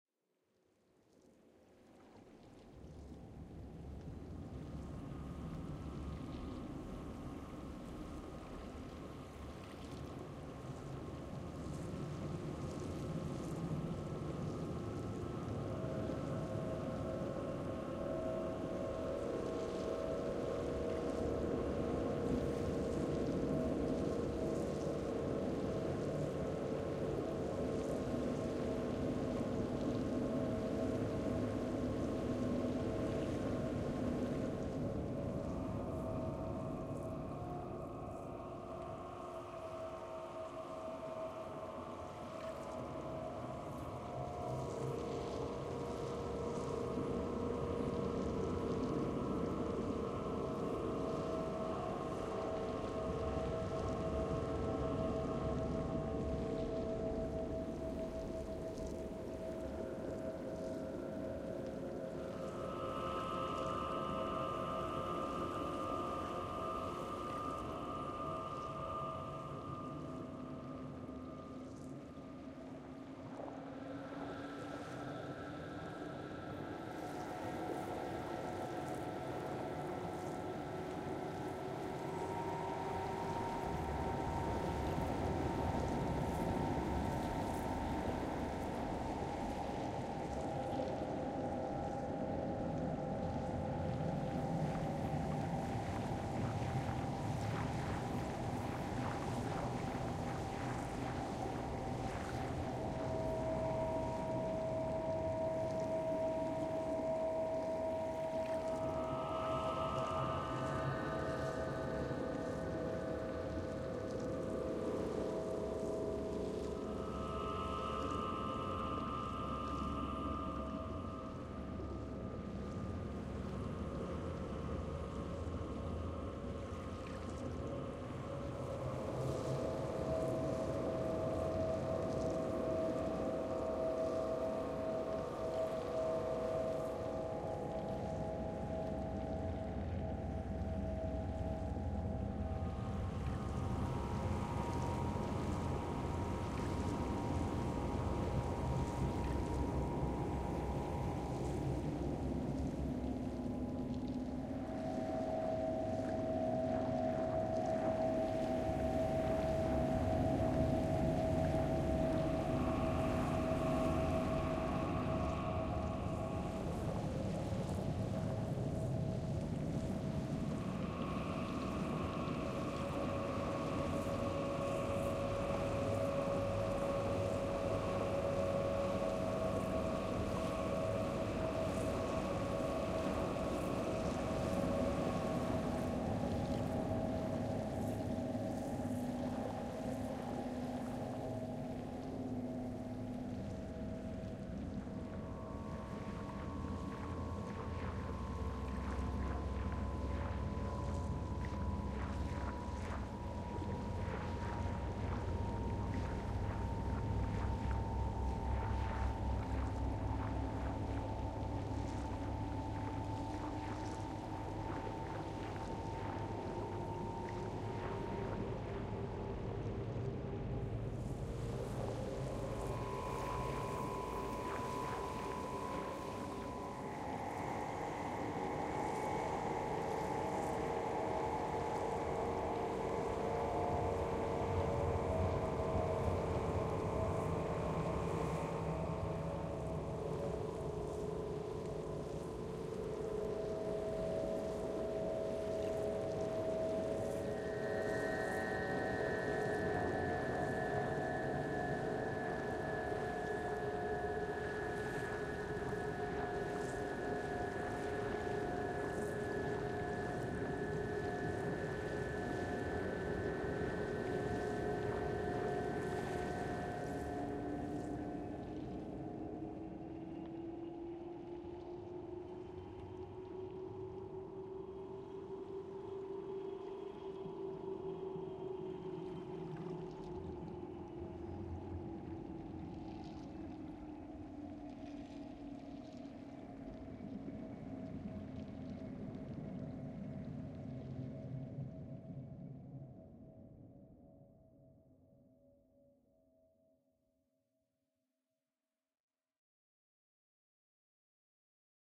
Sonic Ambience Wire and Ice
An ambient soundscape generated with processed field recordings of waves and wind mixed with synthesized sounds.
soundscape, field-recording, atmosphere, mysterious, eerie, ambience, background-sound, abstract